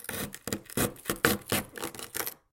Scraping freezer ice with a knife